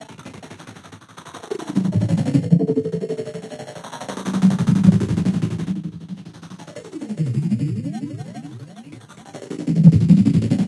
apoteg loop05
ancient bits of sound I had rendered a long time ago for a friend's music project I secretly collaborated on ;)
These are old programmed synthesizers with heavy effects, each one slightly different. And they're perfectly loopable if you want!